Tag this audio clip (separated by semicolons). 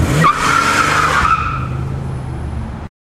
screech; squeal; tire